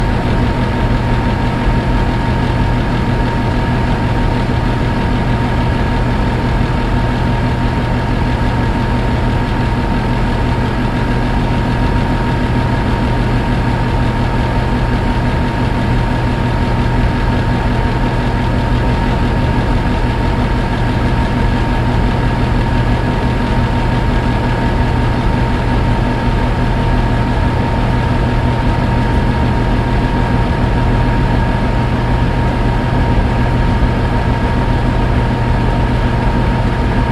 heater blowing 02
I recorded a heater. Could work for any sort of fan though.
ventilation, fan, heating, noise, blow, blower, vent, conditioning, blowing, heater, humming, hum, wind, industrial, mechanical, ac, machine, air, air-conditioning, ventilator